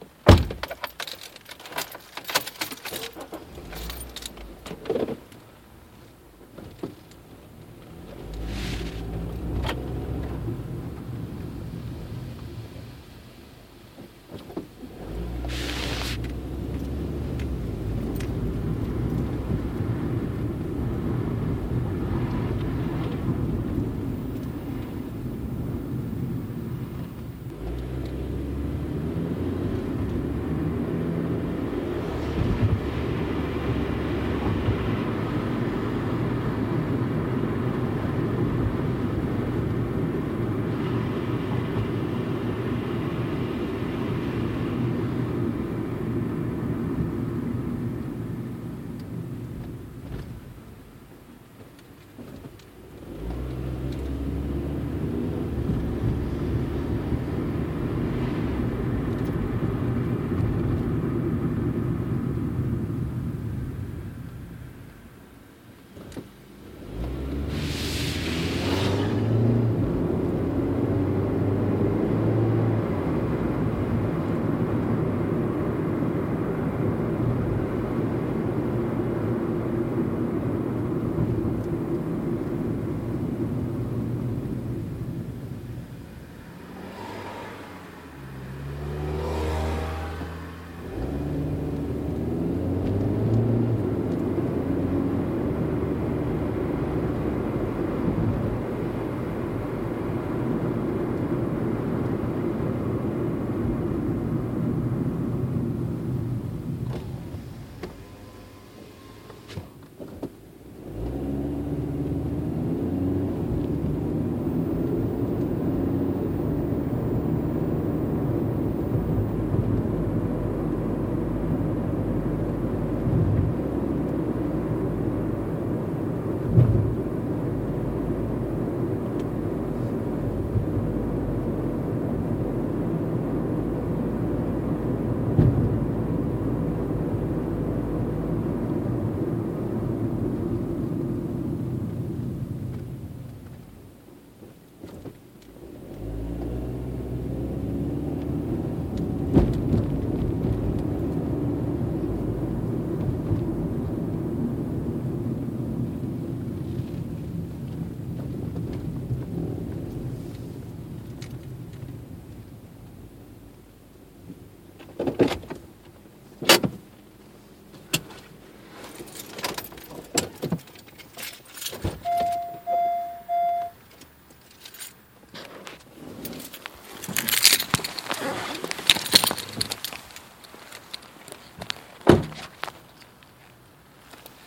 Car ride
Start to finish drive in a Honda Civic, from door opening to door closing after finished.
inside, car, auto, driving, honda